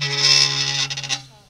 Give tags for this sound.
scratch; squeaky; creak; squeak; metal

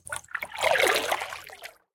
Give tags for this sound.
splash; paddle; lake; river

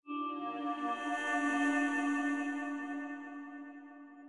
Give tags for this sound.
ambient; dark; free; fx; horror; psy; suspense

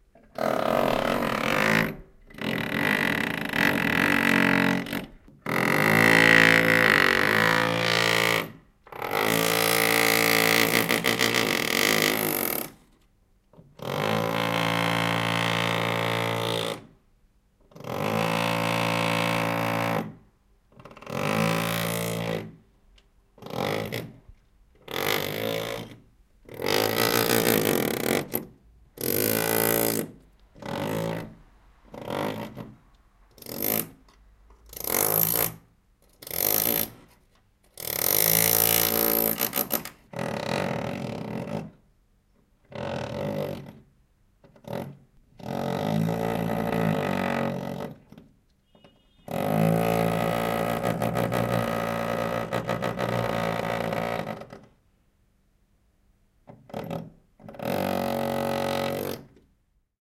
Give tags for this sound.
drill; drilling; wall

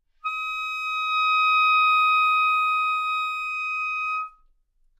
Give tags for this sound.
clarinet; Dsharp6; good-sounds; multisample; neumann-U87; single-note

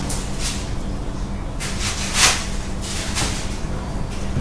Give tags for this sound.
ambience; room; noise

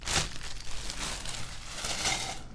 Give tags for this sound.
wings; beast

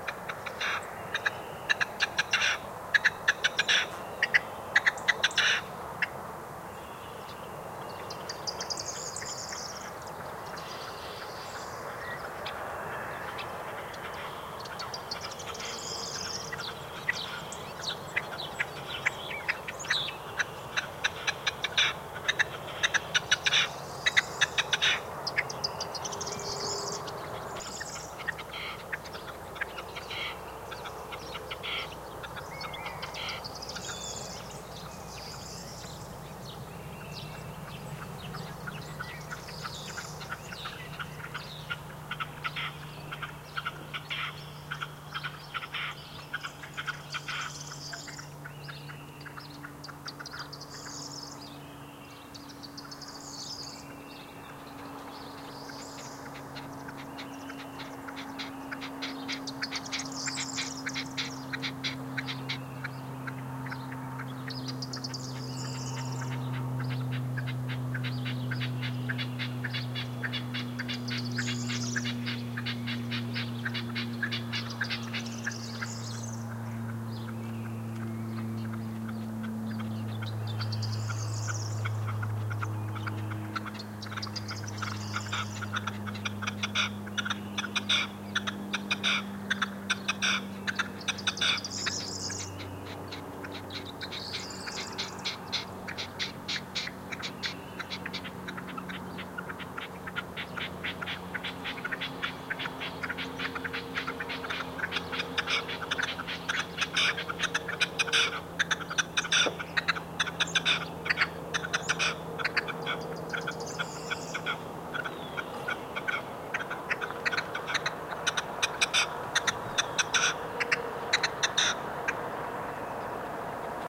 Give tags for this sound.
chirps; field-recording; south-spain; partridge; birds; bunting; nature; winter; ambiance

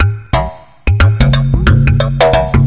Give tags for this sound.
percussion; wavedrum; reason; redrum; electronic

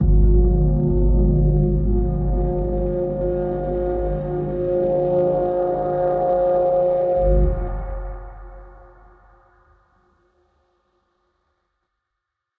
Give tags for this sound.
Pad; commercial; Drums; Loop; Piano; Sound-Design; Looping; Ambient; Cinematic; Drone; Atmosphere

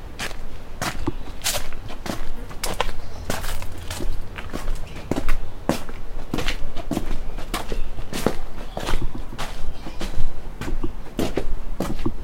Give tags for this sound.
Outside; Steps; Walking

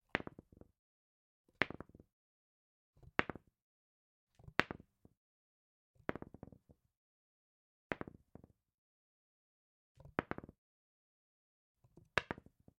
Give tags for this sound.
foley; yatzy; game; die

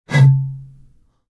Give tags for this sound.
fx; human; recording; field; sound

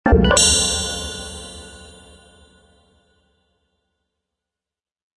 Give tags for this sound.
application; bleep; click; clicks; desktop; event; intro; sfx

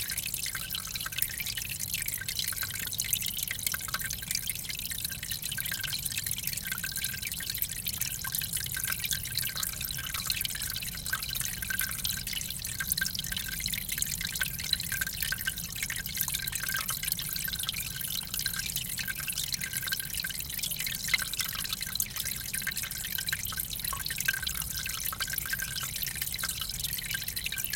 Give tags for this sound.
game; video; ambience; ambient; forest; creek; ambiance; nature; loop; water; atmo; stream; autumn

